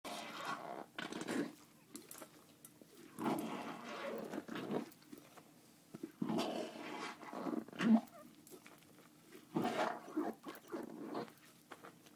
washing up glasses, useful for monster sounds if distorted